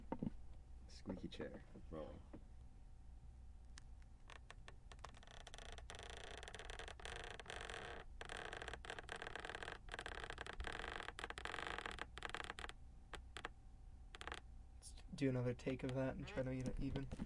Leaning back in a squeaky chair